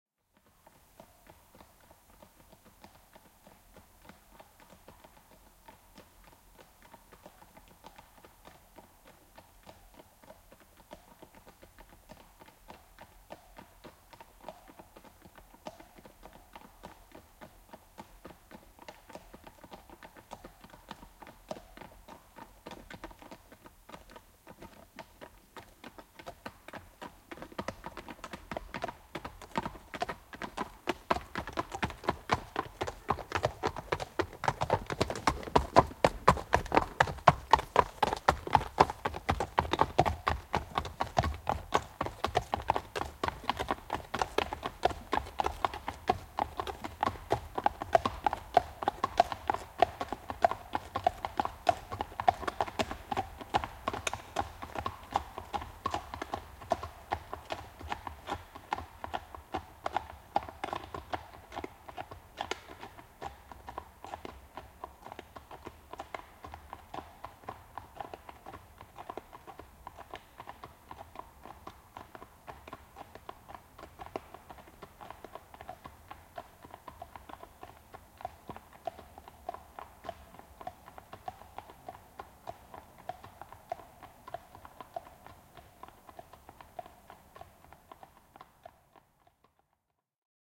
Hevoset ohi, kaviot / Two horses passing by walking on asphalt, hooves clattering
Kaksi hevosta käyden ohi asfaltilla, kavioiden kopsetta.
Paikka/Place: Suomi / Finland / Kitee, Sarvisaari
Aika/Date: 12.07.1982
Clatter, Steps, Tehosteet